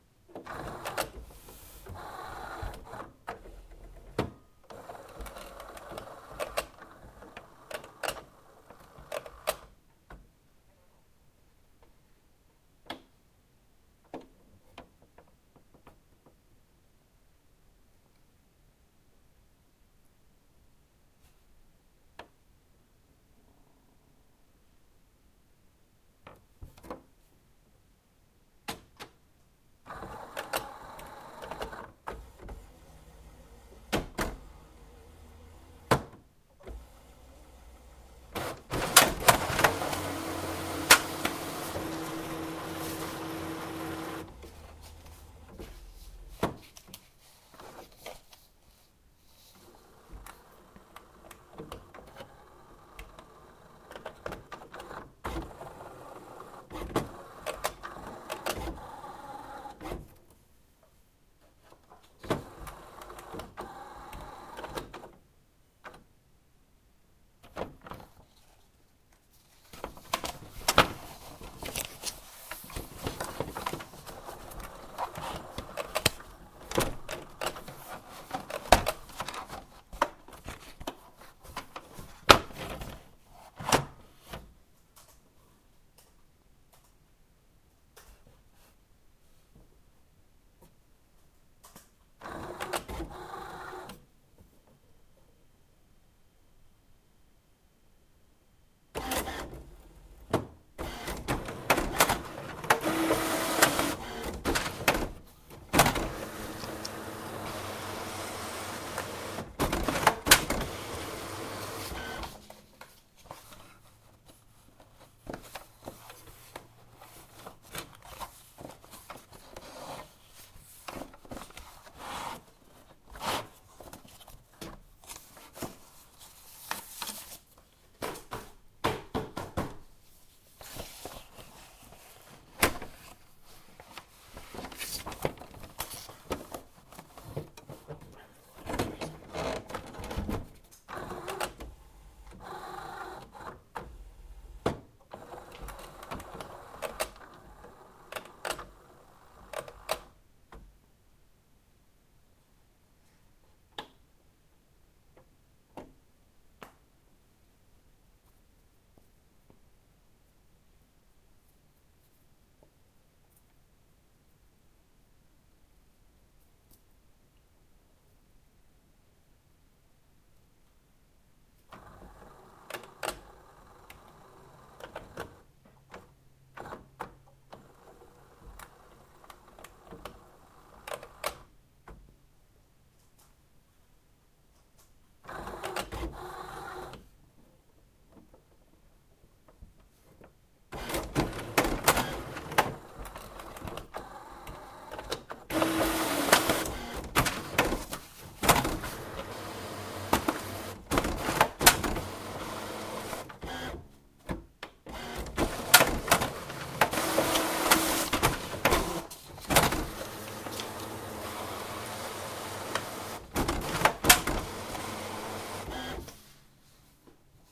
My deskjet 980C acting screwy. It didn't want to print so I went and grabbed my plextalk ptp-1 and recorded the sounds it made.